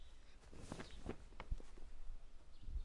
bedsheet short
short bedsheet rustle with morning ambience in the background
It helps this community a lot :)
besheet, cloth, morning, short